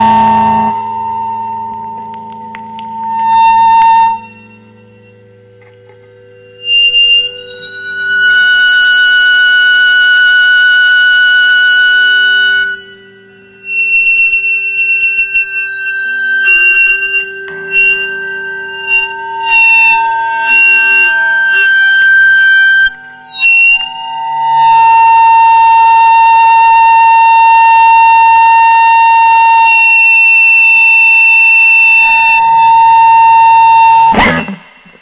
Coupled Guitar
Coupled Fender Deluxe Telecaster using a Fender Deville.
deville, fender, guitar, telecaster